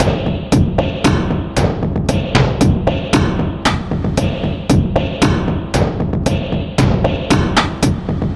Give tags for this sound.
Industrial
Machinery
Steampunk

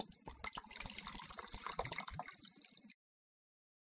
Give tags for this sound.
agua
fresh
water

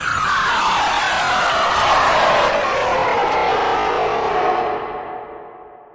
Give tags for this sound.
detonation
blast
bomb
shockwave
explosion
ignite

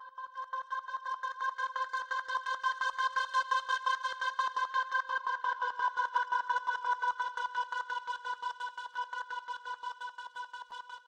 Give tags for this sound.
dnb
gated
BPM
bass

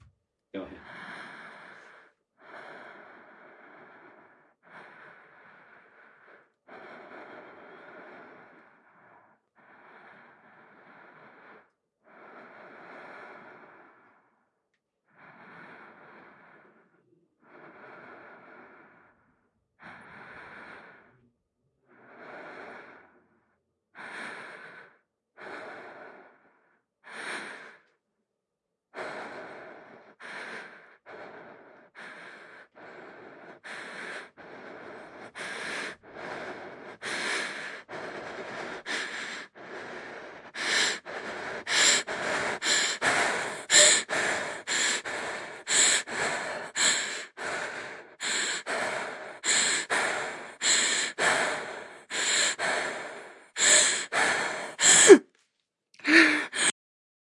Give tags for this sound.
Breath
Breathing
Female

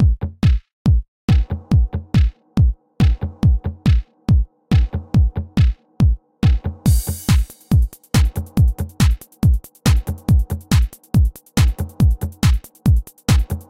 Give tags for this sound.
loop
video
8-bit
chords
drum
synth
drums
digital
sample
game
awesome
music
melody
hit
sounds
loops
samples
synthesizer

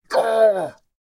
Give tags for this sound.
pain
agony
yelp
scream
male
hurt
pained
voice
dialogue

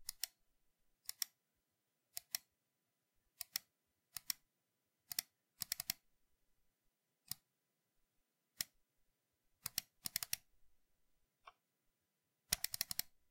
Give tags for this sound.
logitech
switch
click
button
mouse
press

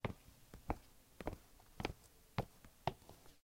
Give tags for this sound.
steps
woman
walking

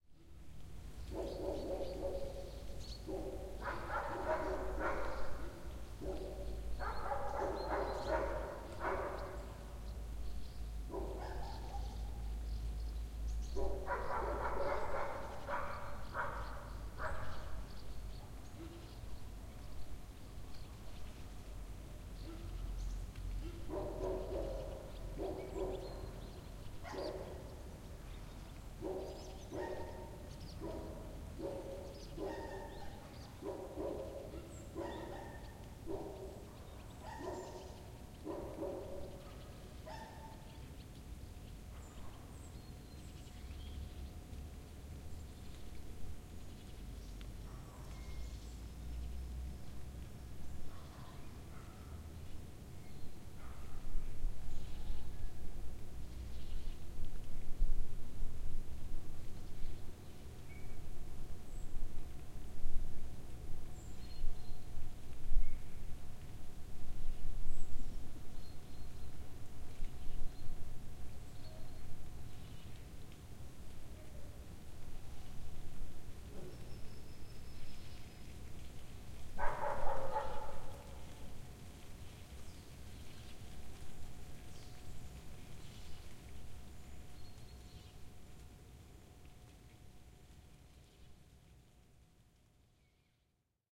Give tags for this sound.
nature
forest
Early

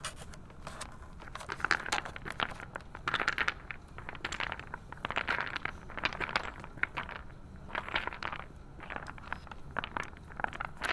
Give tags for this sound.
city
night

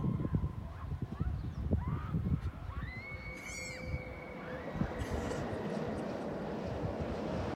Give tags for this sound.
bungy
Bloukrans
bungee

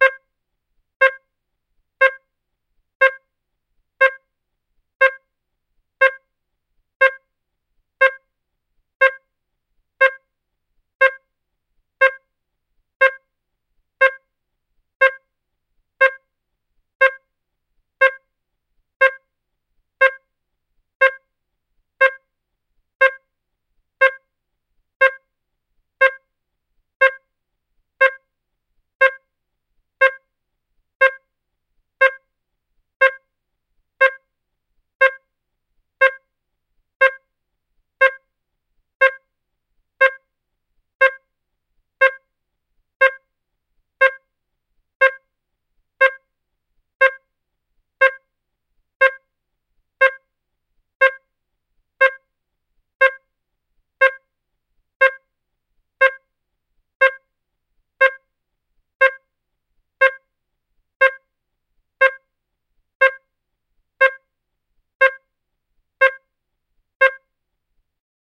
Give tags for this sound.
beep
beeping
computer
countdown
digital

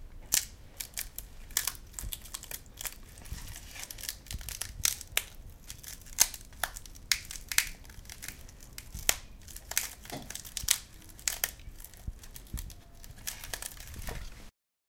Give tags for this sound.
fire
palm-tree
Sparks

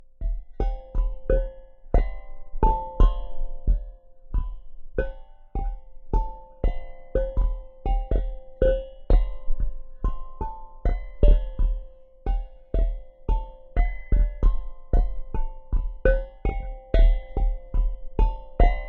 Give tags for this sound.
asmr
drum
hit
percussion
rhythm
sticks
toy

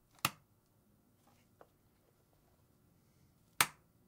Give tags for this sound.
switch
off
flip

